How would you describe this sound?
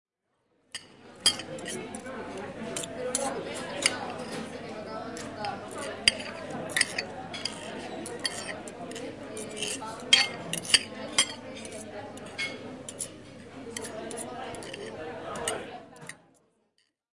paisaje-sonoro-uem comida tenedor
Paisaje sonoro del Campus de la Universidad Europea de Madrid.
European University of Madrid campus soundscape.
Sound of fork and food
Sonido de tenedor y comida